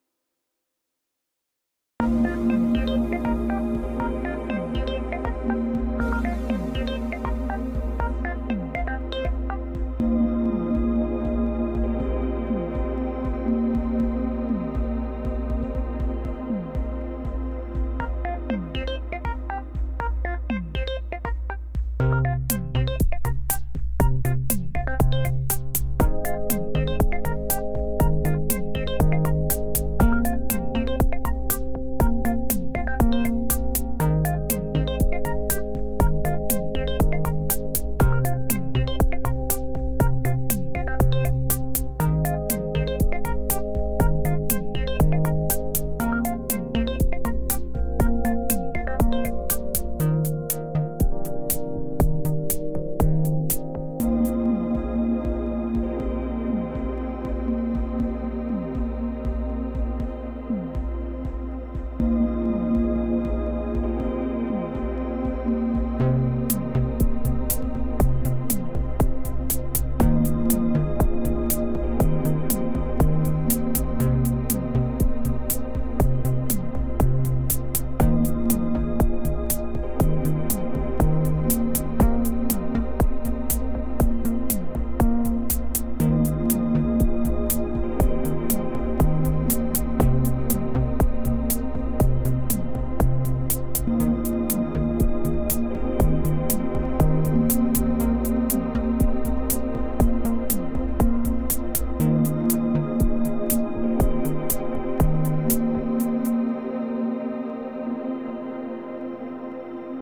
Background e-music fragment.
minimal e-music.